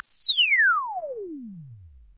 Basic High pitch Tone edited to drop lower pitch then to fade.
Audacity